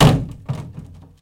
Plastic, jerrycan, percussions, hit, kick, home made, cottage, cellar, wood shed